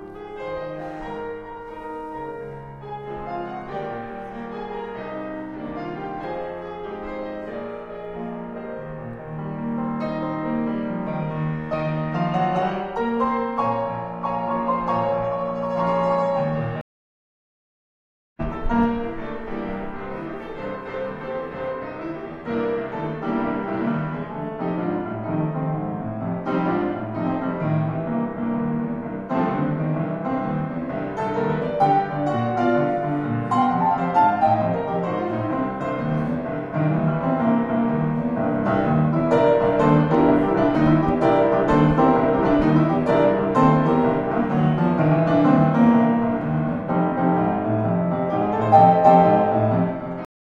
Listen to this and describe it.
Ambience,Piano,LargeHall
A grand piano being played in a large hall in london
Large-Hall, Grand-Piano, Ambience, Piano